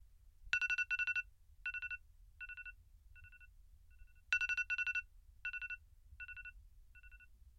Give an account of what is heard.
iphone alarm
iphone morning alarm
2448, alarm, iphone, mono, morning